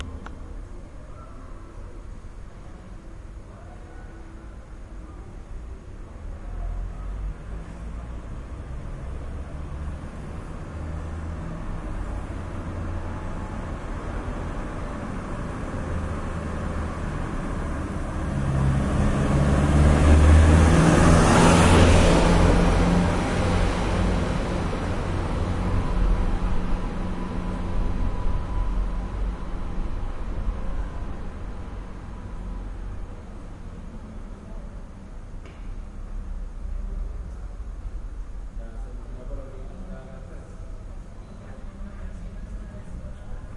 Quiet Street Truck Passes By
A loud truck passes by on an otherwise very quiet street.